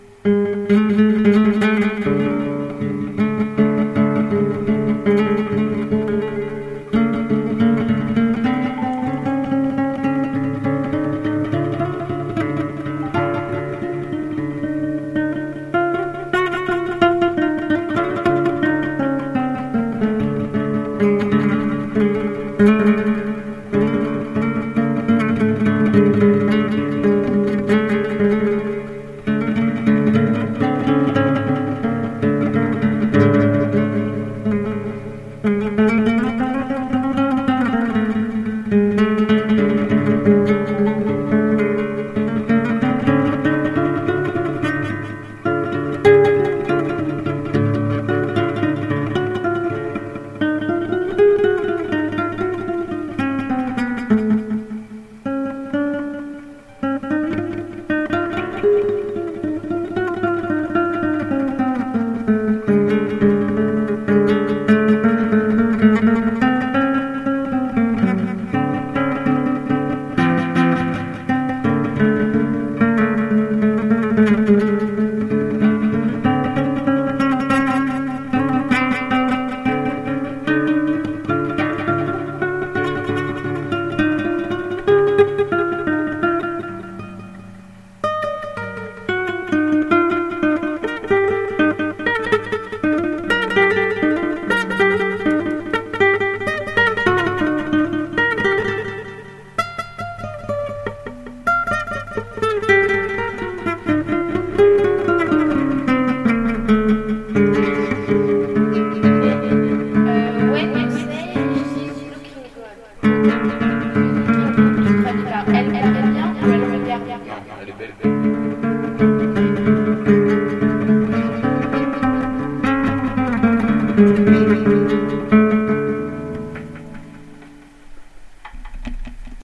impro indian echo
Impro guitar Indian style with some echo sounds like sitar :)